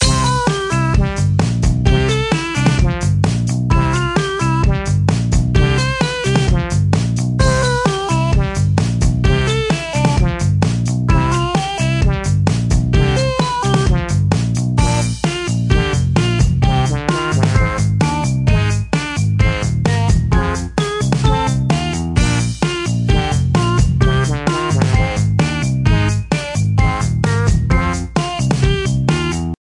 cute melody
a cute and happy melody with some drums.